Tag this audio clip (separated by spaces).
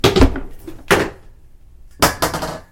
bucket dustpan foley toppled